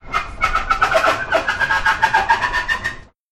Squeaky Mechanism
Big parasol being wound down in a cafe at night in Berlin.
Recorded with a Zoom H2. Edited with Audacity.
Plaintext:
HTML:
mechanical, mechanics, mechanism, metal, parasol, squeak, squeaks, squeaky, wind, winding